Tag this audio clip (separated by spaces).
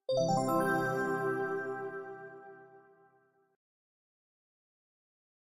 congratulations finish game happy video-game win yay